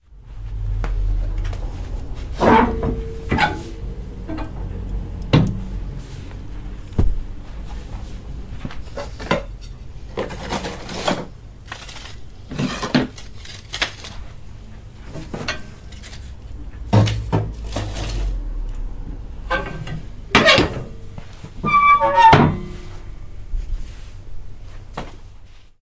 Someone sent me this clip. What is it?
Living room - Filling up the stove with some wood
Recorded in my living room using my Zoom Handy Recorder H4 and it's build in microphone on 29th of May 2007 around 18:00. It's the sound of filling up my stove with some wood: opening the door, taking some wood, putting it in and closing the doors again... normalized and finalized using some plugins within wavelab.
stove, room, metal, living, wood, doors